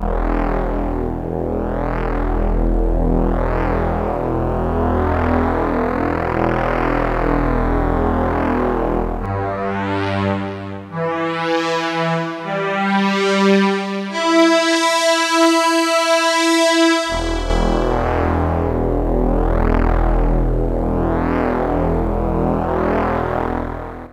A less extreme but still fat supersquare sound from the Roland D50. One note played at the time. As the pitch goes up the digital imperfectness of the D50, or its typical character, when you like, becomes more apparent.
D50, Supersquare